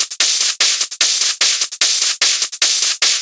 Hats full
hi-hat loop